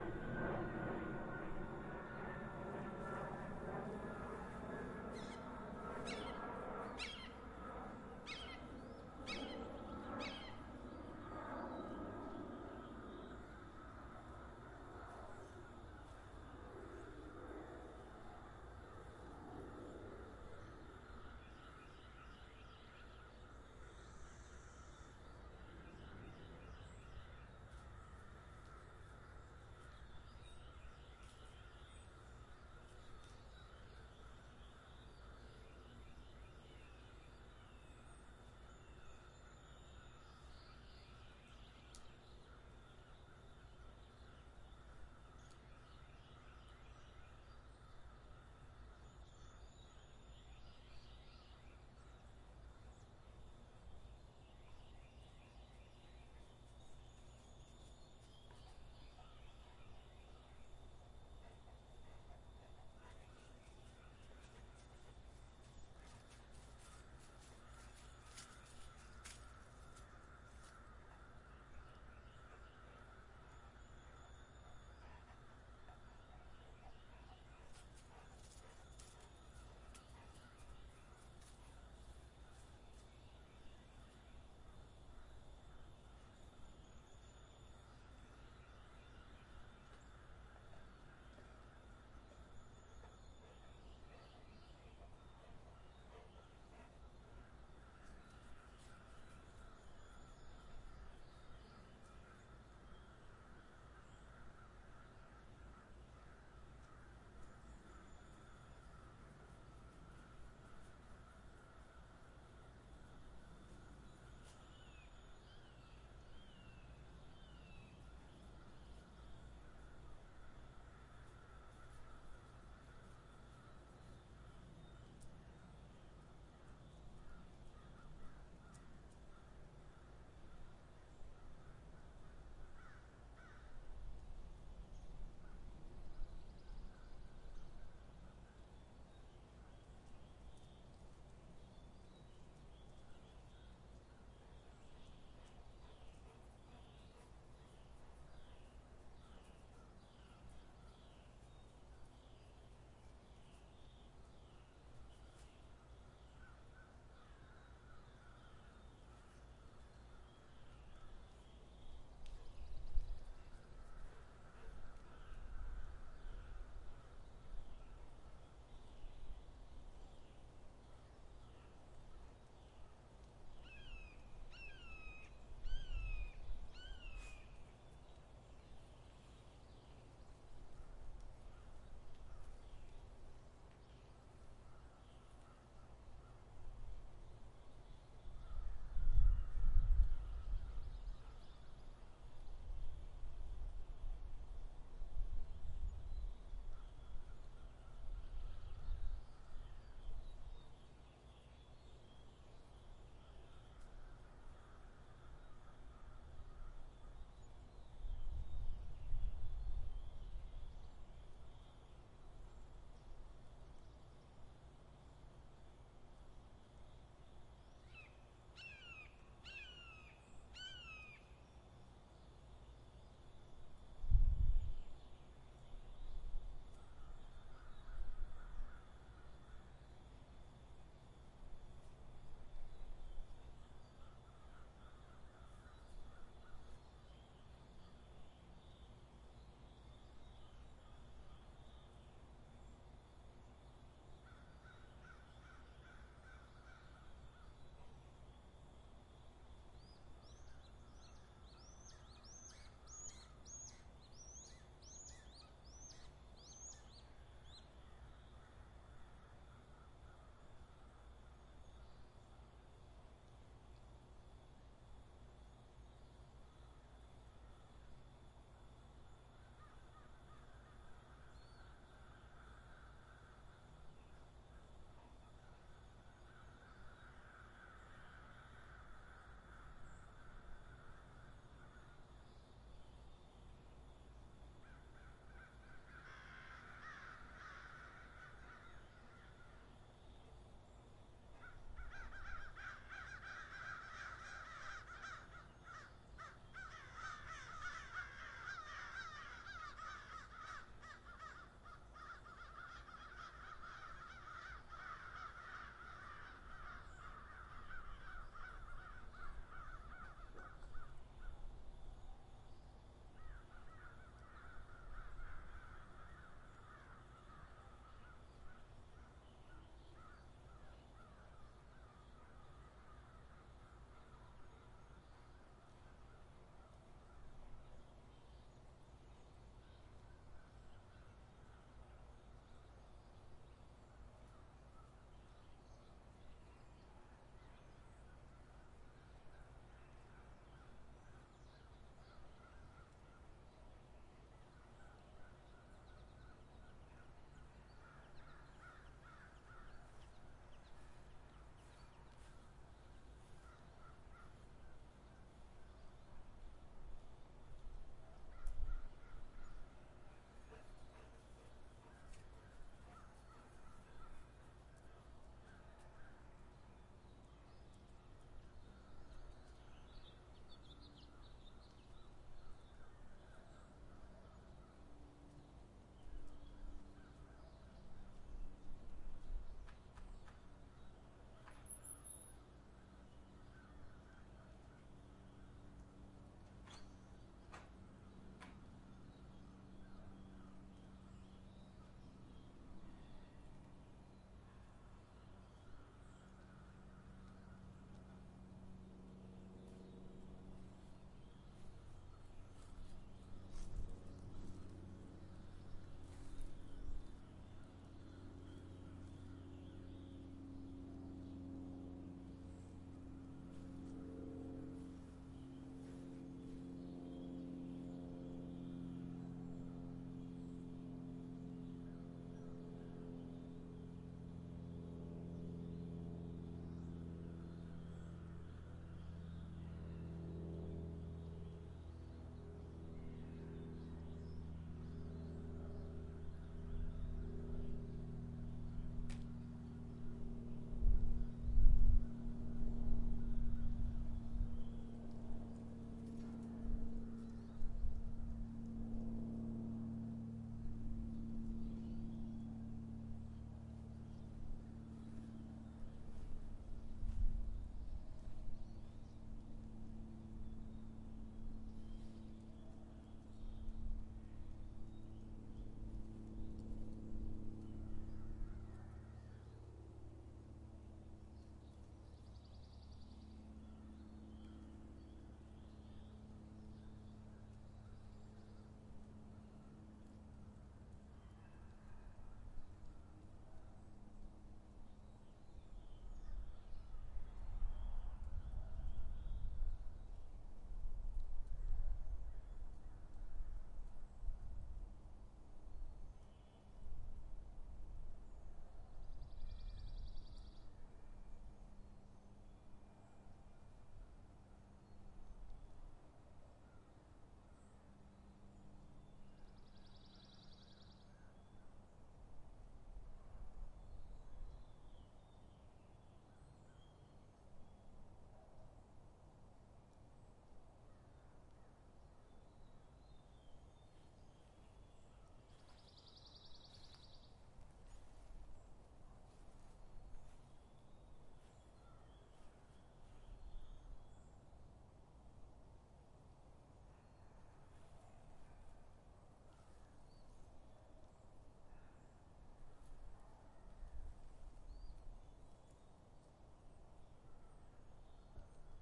planes crows hawks Bud backyard 1.30.16
This file contains a jet flying over as well as a prop plane, hawks screeching, crows cawing, and my Golden Retriever Bud sniffing around. This file can be broken up into segments and used for many projects.
It was recorded with a Zoom H5 in the southeastern portion of the US. Enjoy!
a, crows, Golden, Hawks, planes, Retriever